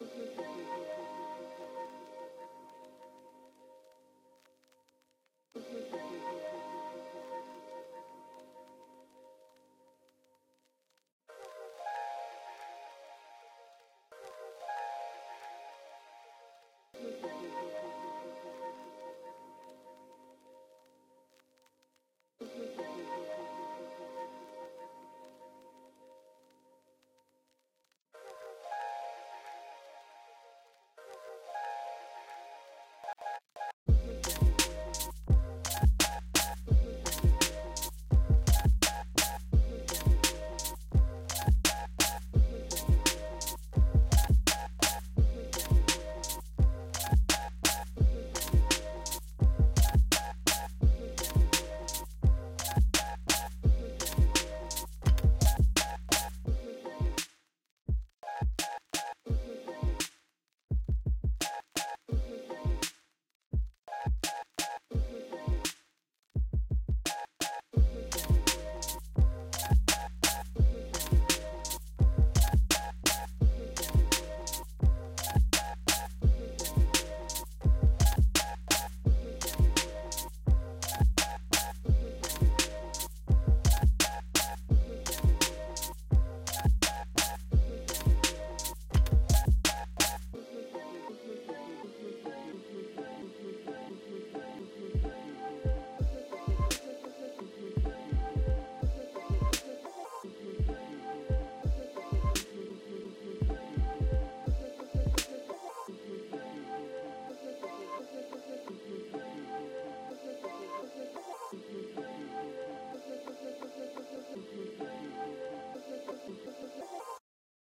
A lo-fi beat.